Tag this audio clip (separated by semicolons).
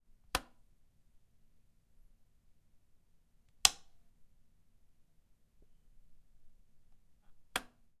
light; off; switch